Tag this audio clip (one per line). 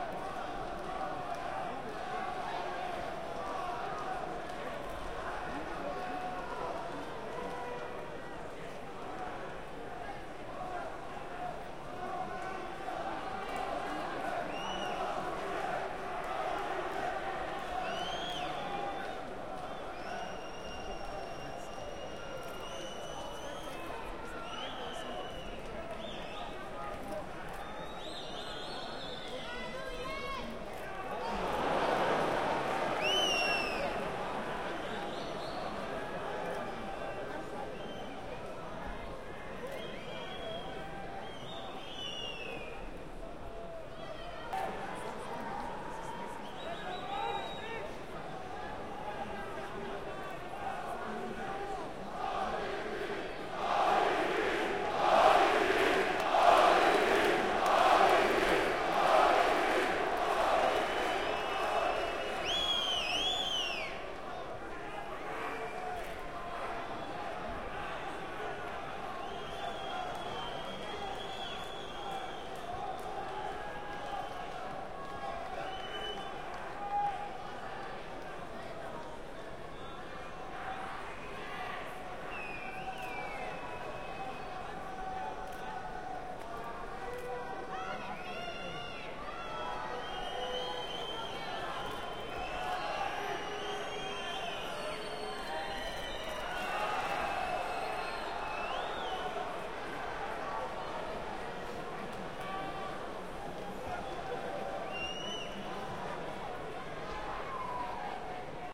ambiance
valuev
holyfield
boxing
crowd